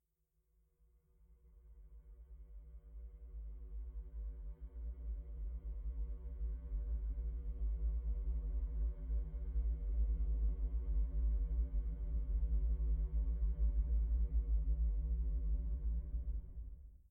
Horror Sound [1]
Just a little sound-file for a horror-game or something.=D
It was created with: Audacity 2.0.6
Recording or made by software: Made in Audacity
Location of recording: --
Date and time of recording: --